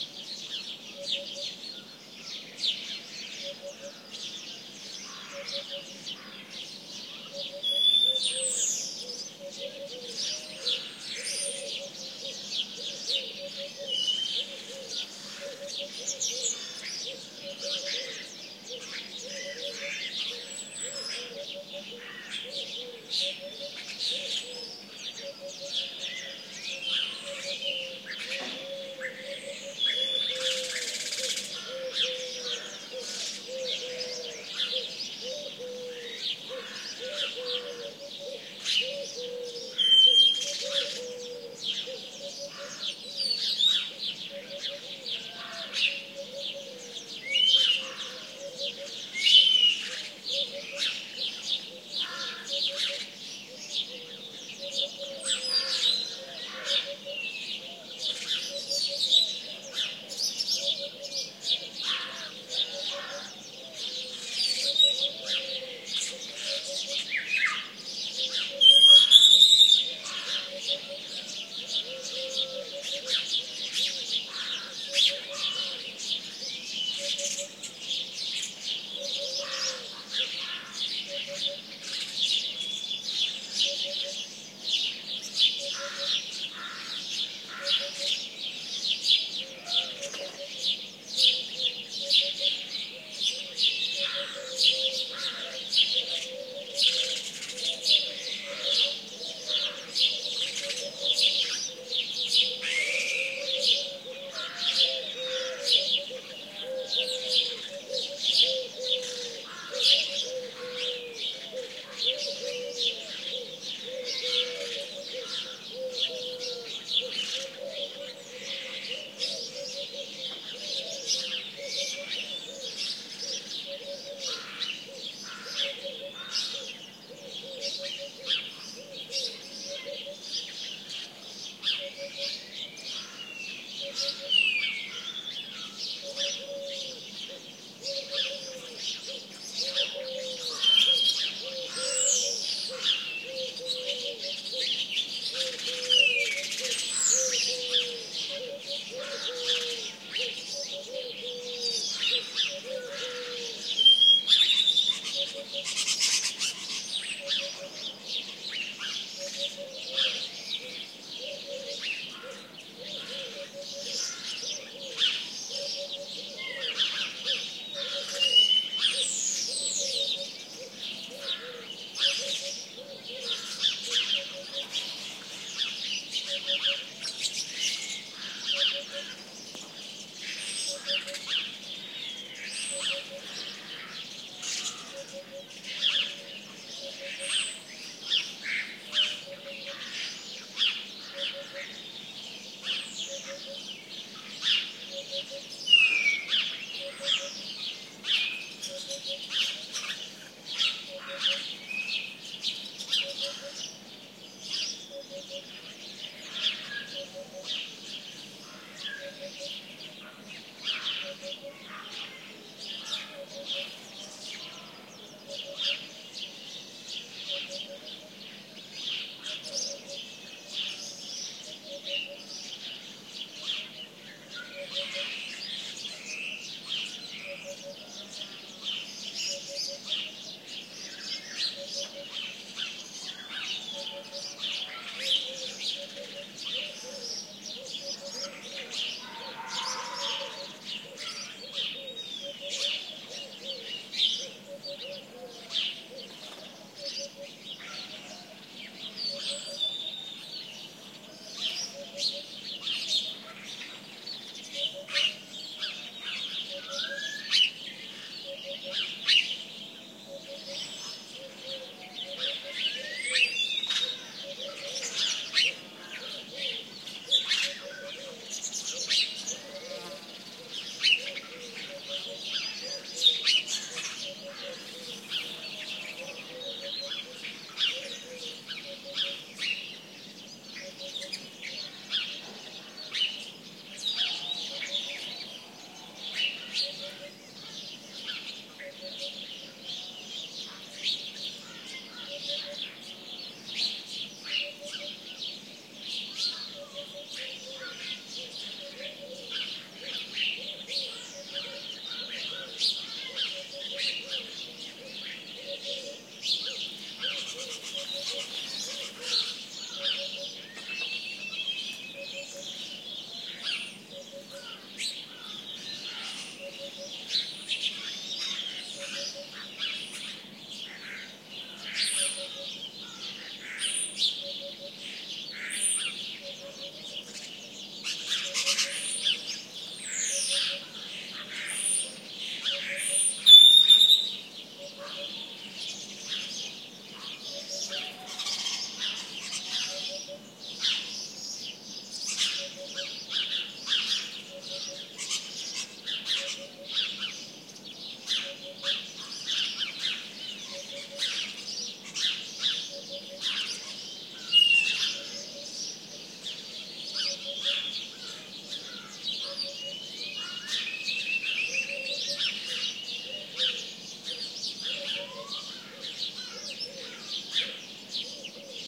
20130418 dawn.country.house02
Longish recording of the bird morning chorus around a country house near Castelo de Vide (Alentejo, Portugal). Recorded with Audiotechnica BP4025, Shure FP24 preamp, PCM-M10 recorder. Will somebody notice this recording is great? Esta grabación es cojon**da, espero que alguien se de cuenta